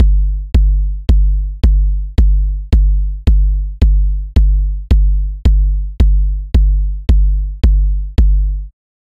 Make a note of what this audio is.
Trap-Moombahton Kick Loop 1
Heavy Moombahton or Trap kick Loop 4x4.
Drums, Bass-Drum, Drum